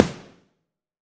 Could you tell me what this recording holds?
HAIR ROCK SNARE 003
Processed real snare drums from various sources. This snare sample has lots of processing and partials to create a huge sound reminiscent of eighties "hair rock" records.
snare, drum, sample, real